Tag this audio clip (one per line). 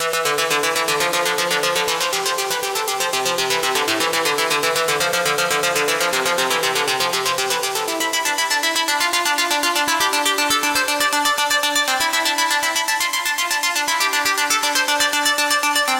fast
loop
mental
synth
synthesiser
techno
xpander